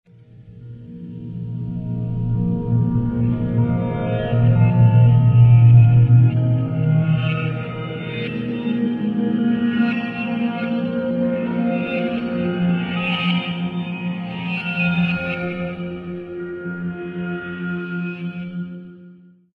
ambient synth moment
ambient, soundscape